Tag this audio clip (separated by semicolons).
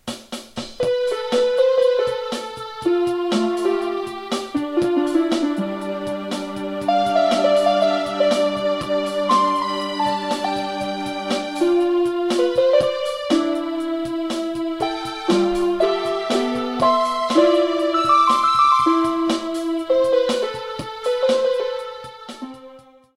soft; intro